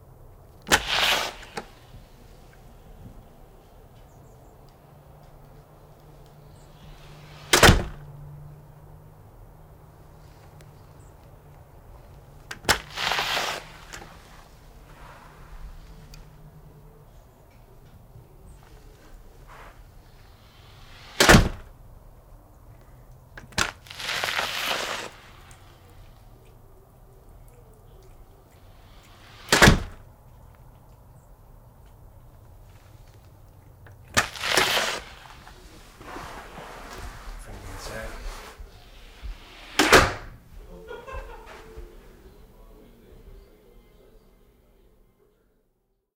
Modern Front Door from outside DonFX
Modern Front Door open and close from outside perspective.